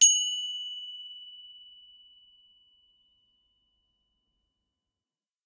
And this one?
Small bronze bell.
bell, small